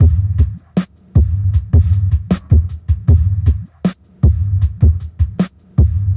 78bpm madcrew
78 bpm quite slow hiphop/breakbeat loop